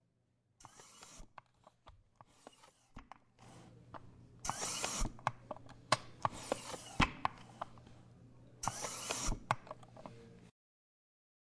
CHAIR HISS
This record is of a chair (matte steel material) with an elevation mechanism moving up or down.
compressor, suspenders, mechanism, elevation, lift, chair, air, hiss